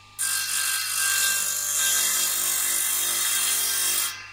circ saw-03
Distant circular saw sound.
electric-tool, circular-saw, saw